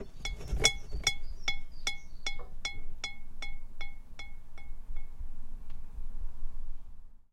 The mains lead from a lamp clinking against a ceramic flower vause recorded with an ME66!